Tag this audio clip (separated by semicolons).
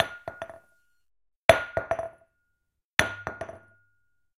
heineken; ORTF; XY120; bounce; bouncing; drop; beer; XY90